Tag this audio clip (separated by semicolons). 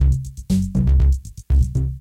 Analog; Classic; Drum-Machine; Lofi; Waltz; Yamaha-MR10